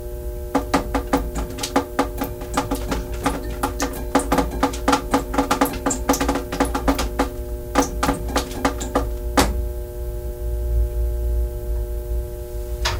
Shower Water Running Drip